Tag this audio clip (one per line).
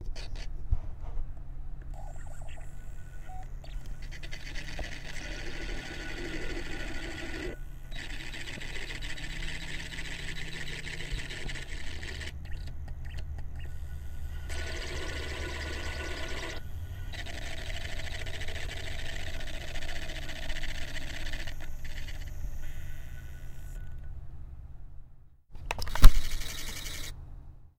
robot
spinning
player